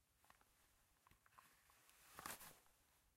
Holstering a revolver in a leather holster. recorded with a Roland R-05